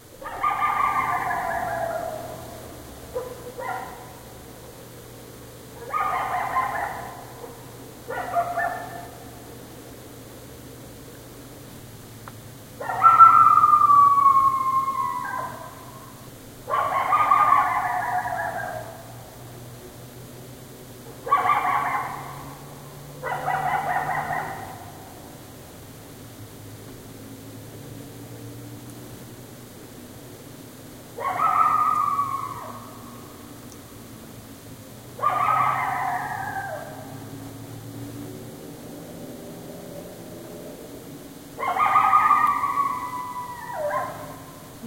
coyote barks and howls
A coyote in the woods behind our house. Unfortunately a little ways off, so recorder noise is noticeable. Recorded with a first-gen moto x.